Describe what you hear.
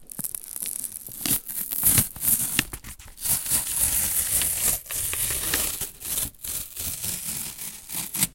Queneau Carton 01
grattement sur un carton alveolé
pencil, paper, cardboard, scrape, scratch, scribble